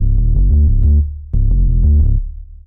90 Subatomik Bassline 07
fresh rumblin basslines-good for lofi hiphop
atomic, bassline, series, electro, free, grungy, loop, hiphop, sound